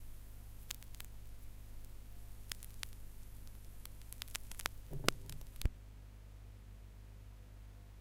LP End #1
The sound of a needle being automatically pulled off a vinyl record.